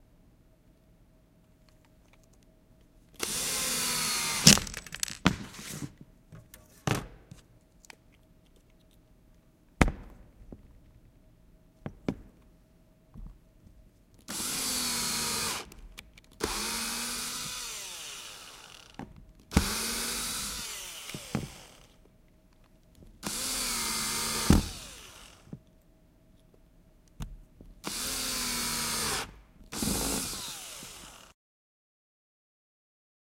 An electric drill.